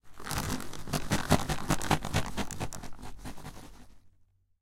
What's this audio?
shake snack
shaking of closed chips bag, Recorded w/ m-audio NOVA condenser microphone.
bag, chips, doritos, envoltura, papas, shake, snack